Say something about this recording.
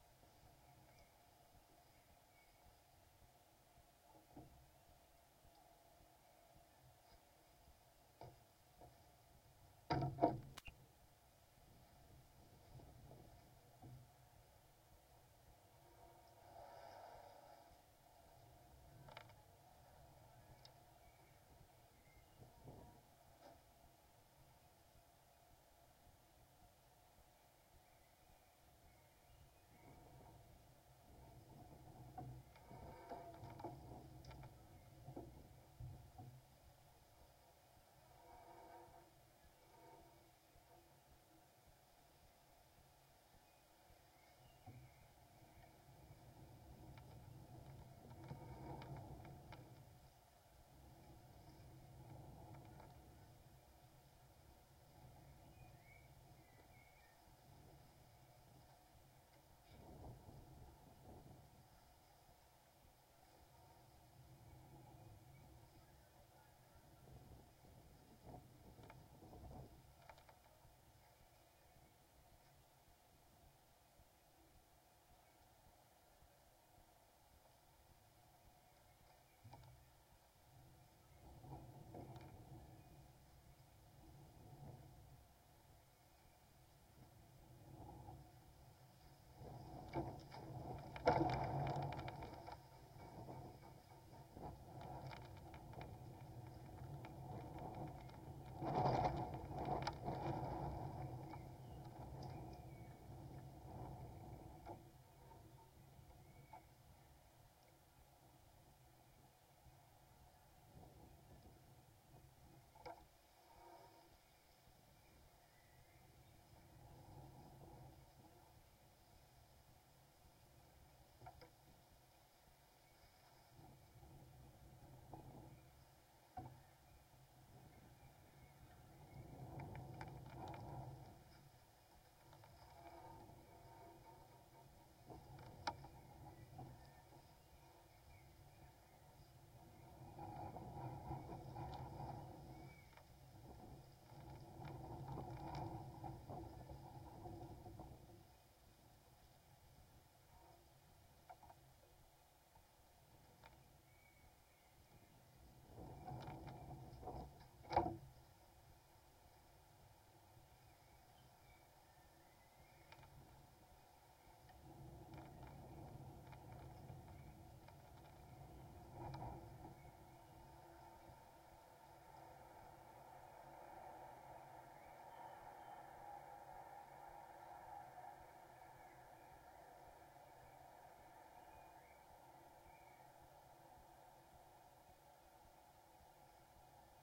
Home made piezo microphone attached to a wood garden fence on a windy day.
Approximately 2 min 25 sec of a much larger recording I made. Audio cleaned up with Izotope RX6 (the piezo mic and cable were quite bad and noisy).
Amazingly could pickup some bird sounds when connected to the fence.
Really good gust of wind captured at about 1min 30sec in this recording.